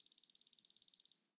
Proximity Card Door Lock - Card Error
Rapid beeping of a proximity card door lock when it doesn't accept the card being held in front of it. Brighton, May 2016. Recorded with a Zoom H4n close-up, some noise reduction using iZotope RX5.
Machine, Electronic, Lock, Beep, Door